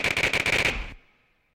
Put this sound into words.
Our editor Joe Beuerlein created this gun burst. This is the interleaved (5.0) version.
fi, science, sci